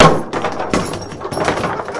Recording during table soccer playing using 10 balls at once. I cutted out this part to use it as a tom in a drum rack. It is 2 sec long